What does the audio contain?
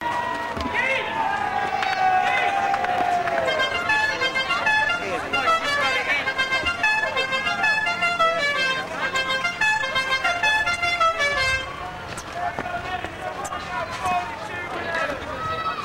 Car Horn Tune. 1970's.
Musical car horn, 1970's. Recorded in crowded area with ambient sound of people. I've left plenty of space to fade in and out for your project.
Musical-Car-Horn, Car-Horn-in-crowd, Car-Horn